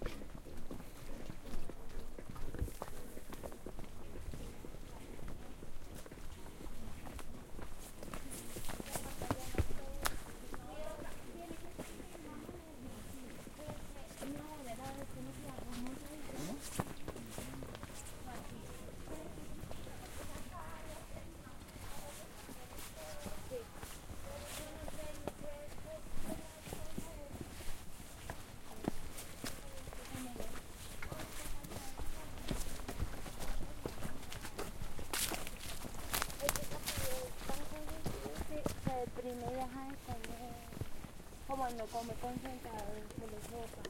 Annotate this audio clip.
Quebrada La Vieja - Voces de caminantes con sus pasos
Grabación en la Quebrada La Vieja Bogotá - Colombia
Persecución a un caminante que reproduce música en altavoz.
Voces y pasos de caminantes en un bosque de eucaliptos a las 09:00 a.m.
Field recording from river La Vieja Bogotá - Colombia
Persuit to a pedestrian that reproduces music outloud.
Voices ans steps from pedestrians inside an eucalyptus forest at 09:00 a.m
outloud, bosque, naturaleza, pedestrians, colombia, altavoz, voces, people, paisaje-sonoro, field-recording, forest, nature, footprints, eucalipto, caminantes, personas, persuit, pasos, voices, persecucion, eucalyptus, bogota, music, musica